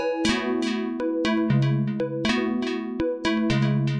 a5sus2 arpeggio stab glassy synth